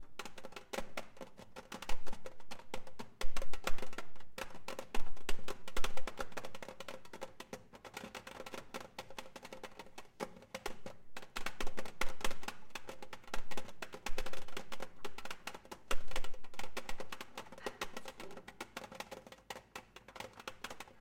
Fingers hitting table
A recording of fingers lightly knocking on a table which creates a sound that sounds like popcorn or rain hitting against a surface like a window
popcorn, OWI, rain, sound-effect